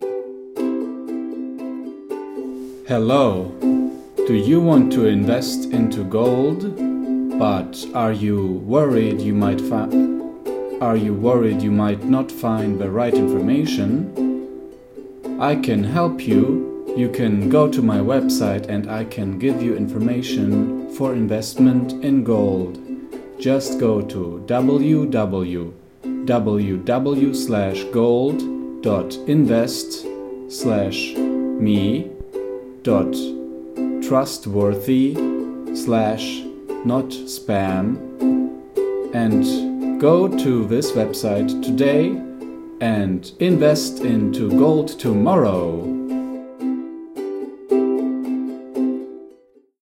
Invest Into Gold Spam Ad
There once was a spammer
With acceptable grammar
His message was boring
A crap deal he was whoring
I just pressed "delete"
And then tried to repeat
His annoying feat
And I added a beat.
This is a homage to being a volunteer user generated content moderator. It is a poorly acted vocal ad read for a fake website to go to and waste money on gold.
ad, advertisement, english, language, male, man, scam, spam, untrustworthy, voice